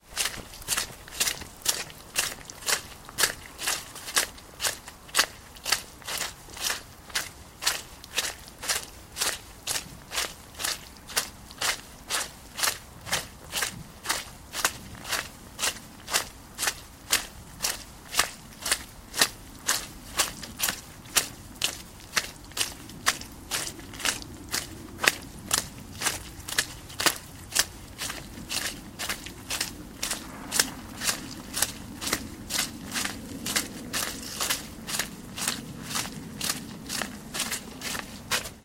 Footsteps, Muddy, A
Raw audio of footsteps in some squelchy mud. There is a distant firework going off around the 30 second mark.
An example of how you might credit is by putting this in the description/credits:
squelch, mud, footsteps, muddy, squidgy, step, foot, steps, footstep